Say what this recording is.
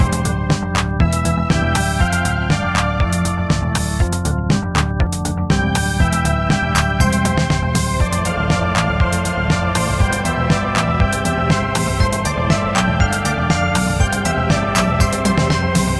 Short loops 12 03 2015 5
made in ableton live 9 lite
- vst plugins : Alchemy, Strings, Sonatina Choir 1&2, Organ9p, Microorg - Many are free VST Instruments from vstplanet !
you may also alter/reverse/adjust whatever in any editor
gameloop game music loop games organ sound melody tune synth happy
organ, game, sound, loop, melody, happy, music, tune